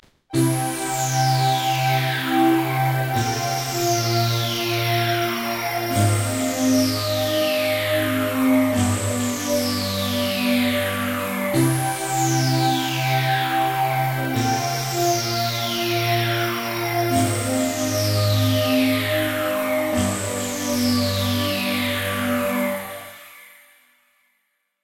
C chord progression in 7/4 time at 150 bpm.